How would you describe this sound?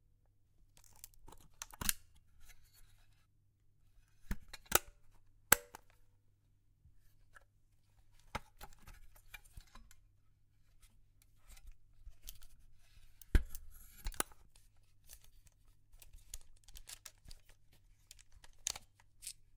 Old SLR film camera - opening back and handle
Opening back door of an old SLR film still camera + handling sounds of metal.
Recorded with Rode NT1-A microphone on a Zoom H5 recorder.